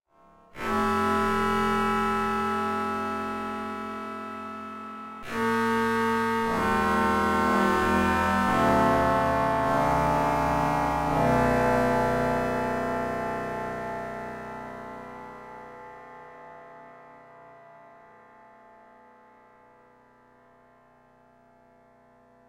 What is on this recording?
Slow Aalto1
This pack comprises a series of sounds I programmed in the Aalto software synthesizer designed by Randy Jones of Madrona Labs. All the sounds are from the same patch but each have varying degrees of processing and time-stretching. The Slow Aalto sound (with no numeric suffix) is the closest to the unprocessed patch, which very roughly emulated a prepared piano.
Aalto, electronic, Madrona-Labs, prepared-piano, processed, soft-synth, time-stretched